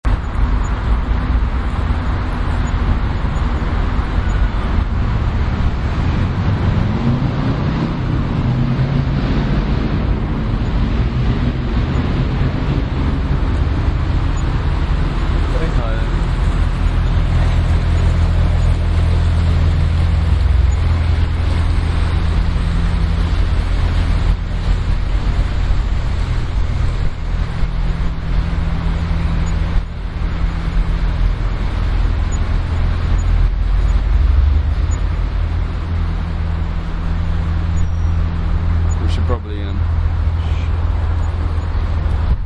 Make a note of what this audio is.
Warwick Avenue - By Canal